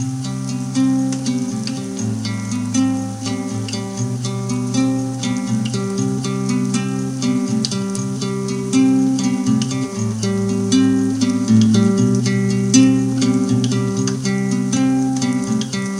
RAIN SOUNDS2 Guitar
A collection of samples/loops intended for personal and commercial music production. For use
All compositions where written and performed by
Chris S. Bacon on Home Sick Recordings. Take things, shake things, make things.
samples, Folk, indie, drum-beat, loops, harmony, acapella, guitar, beat, looping, acoustic-guitar, whistle, loop, drums, percussion, vocal-loops, Indie-folk, bass, rock, synth, voice, free, original-music, sounds, melody, piano